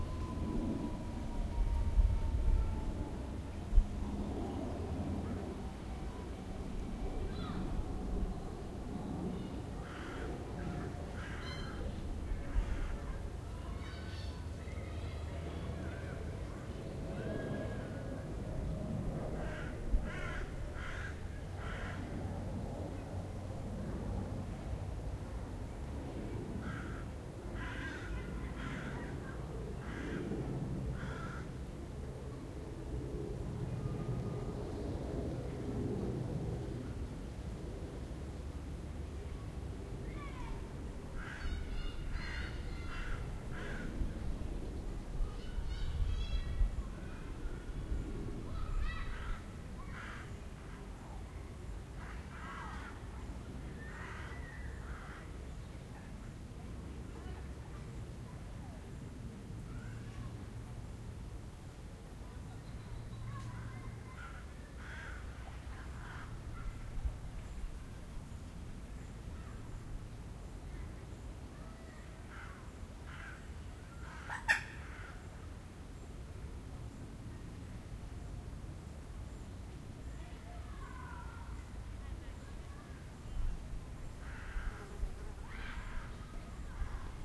london waterloo park
Ambient sounds in Waterloo park in London (England).
ambient, animals, birds, england, jet, kids, london, noise, park, uk, waterloo-park, white